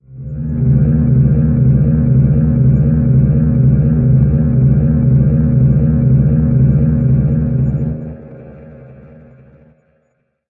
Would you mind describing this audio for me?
Bass Ambience
i've played the notes with an electric bass, the notes sequence are C, D and D#. Recorded with Audacity and edited in Adobe Audition
ambience, bass, creepy, echo, short, sinister